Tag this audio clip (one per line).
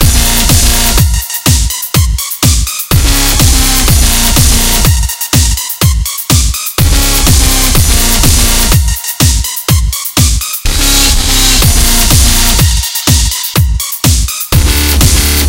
beat
dupstep
hard
hardstep
loop